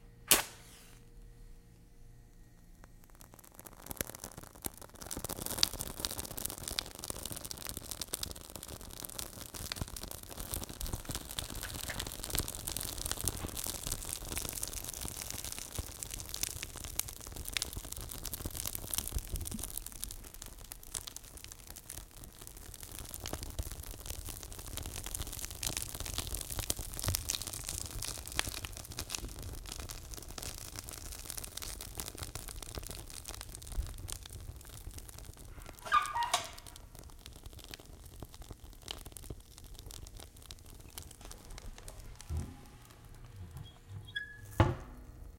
light fire in wood stove with match strike paper burn crackle metal door close
burn, close, crackle, door, fire, match, metal, paper, stove, strike, wood